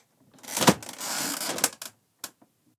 Creaky door opening
Foley effect of a creaky door being opened
Did you like this sound?
creaky, Door, foley, opening, squeaky